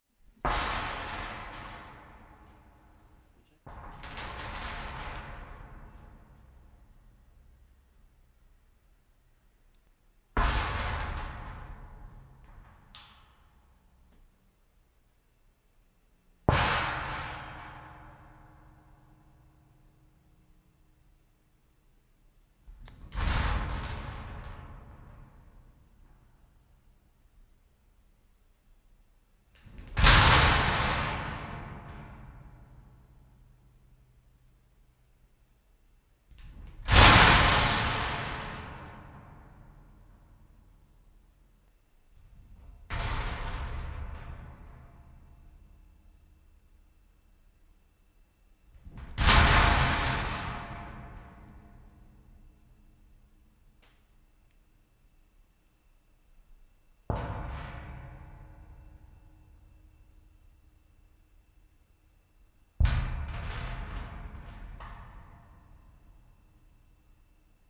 metal locker thuds rattle1
rattle, locker, metal